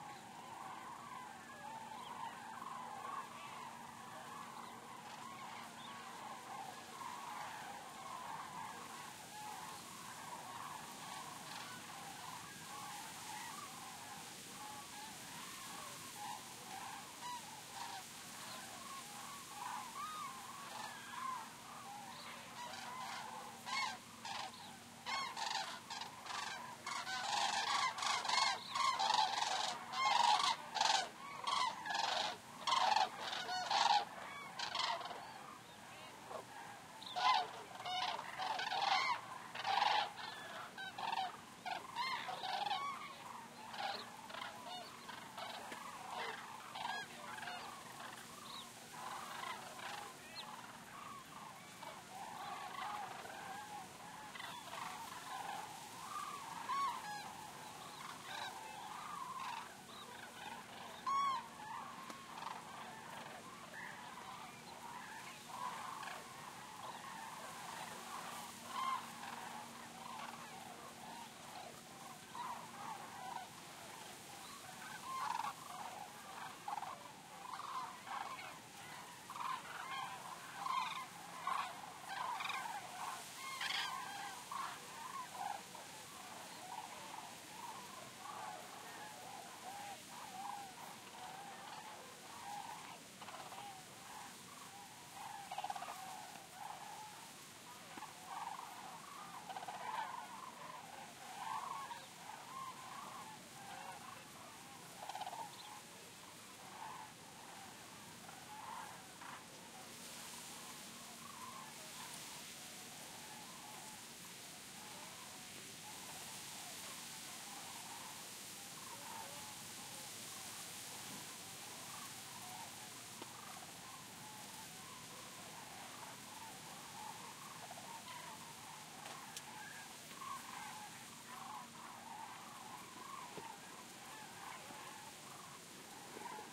animals birds cranes distant to close stereoORTF
This ambient sound effect was recorded with high quality sound equipment and comes from a sound library called Eurasian Cranes which is pack of 13 audio files with a total length of 68 minutes. It's a library recorded on the lake full of Eurasian Cranes. There were more than 1000 birds gathered in one place.
ambience, atmosphere, background, background-sound, bird, field, meadow, nature, pitch, soundscape, summer